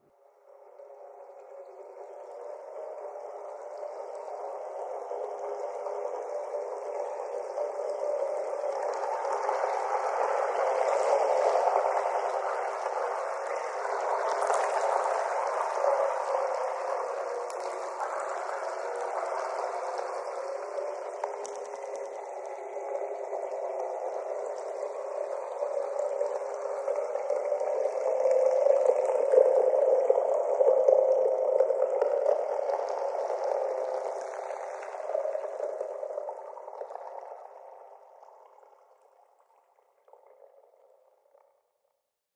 Element Water
Stereo recording of water running.Recorded on an electret microphone.Has been processed and filtered.All elements have a ying\yang property in that they can destroy or aid life,so my samples are mediating the difference between them.